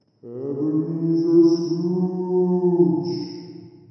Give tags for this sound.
ebenezer; christmas

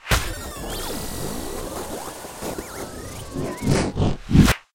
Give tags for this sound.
radio; sfx; bumper

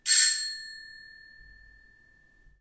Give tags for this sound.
metallic; doorbell; bell; ringing; ring; door; rings

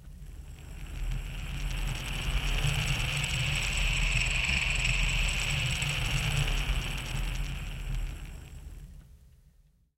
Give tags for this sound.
pulley; soundeffect; spinning; wheel

